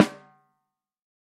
TAC14x8 M201 VELO5
The loudest strike is also a rimshot. Microphones used were: AKG D202, Audio Technica ATM250, Audix D6, Beyer Dynamic M201, Electrovoice ND868, Electrovoice RE20, Josephson E22, Lawson FET47, Shure SM57 and Shure SM7B. The final microphone was the Josephson C720, a remarkable microphone of which only twenty were made to mark the Josephson company's 20th anniversary. Preamps were Amek throughout and all sources were recorded to Pro Tools through Frontier Design Group and Digidesign converters. Final edits were performed in Cool Edit Pro.
14x8, artwood, beyer, custom, drum, dynamic, m201, multi, sample, snare, tama, velocity